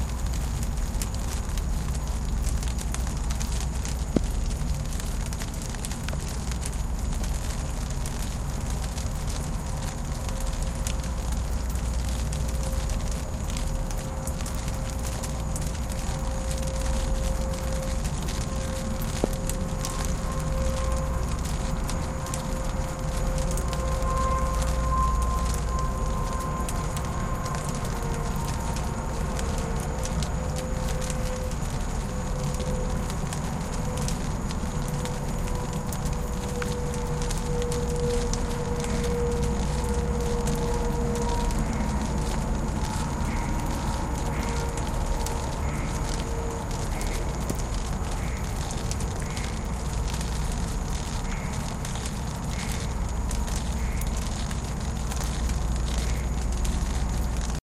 Sounds of the city and suburbs recorded with Olympus DS-40 with Sony ECMDS70P. Rain water runing down a spout from the roof of a building on to a bush after it rained.
drain, field-recording, street, traffic, rain